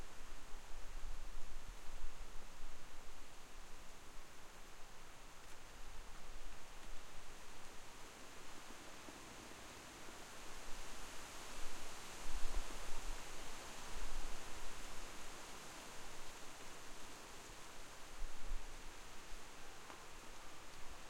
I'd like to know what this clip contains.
outside wind German
outside, wind, German